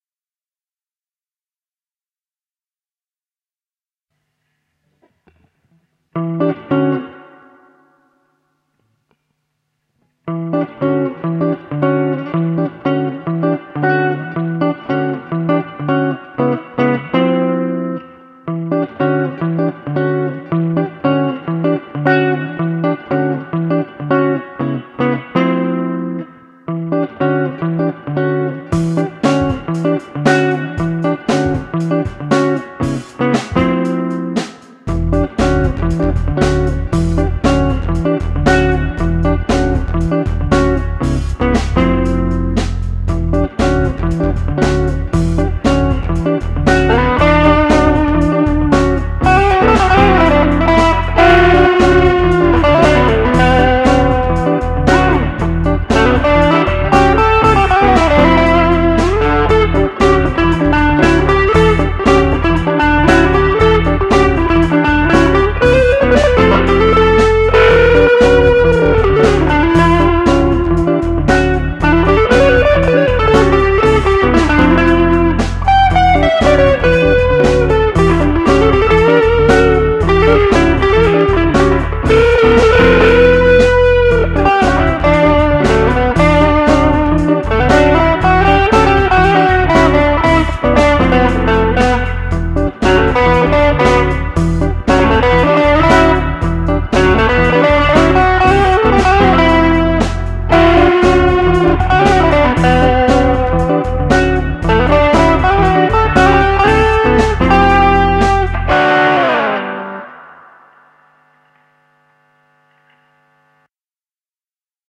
Electric-guitar improvisation on AbletonLive
THis is simple improvisation on my electric-guitar with AbletonLive, where I using sevethy-chords with syncope rythm and melodic solo part.
Key - Em.
Tempo - 117 bpm.
Abletonlive, beautiful, drums, improvisaition, loop, melodical, melodies, riff, song